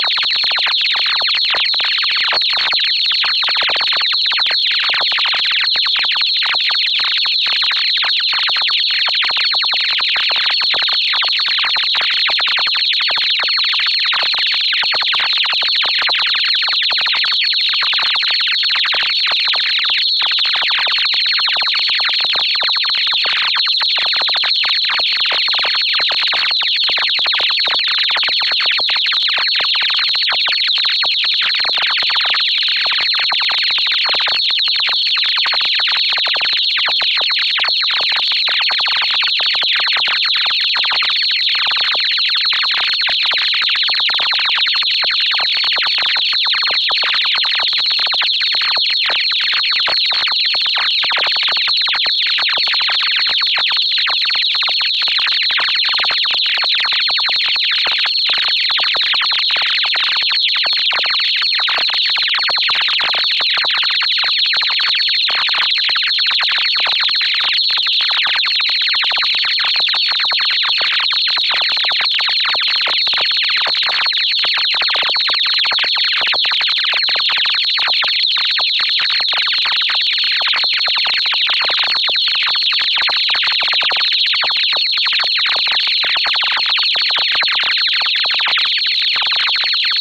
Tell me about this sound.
fast whistlers
testing new generator. this one seems to imitate geomagmetic whistlers.
experimental, drone